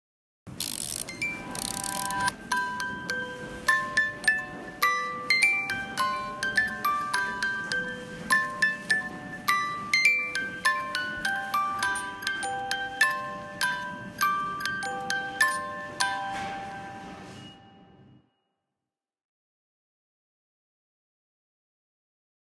An automatic music box playing the french folk tune, "The Bridge of Avignon". Recorded with an iPhone 6 in a souvenir shop. EQ'd and added the tail of the file, reversed, to the initial wind-up.